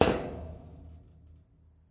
Metal Hit 9
A bunch of different metal sounds. Hits etc.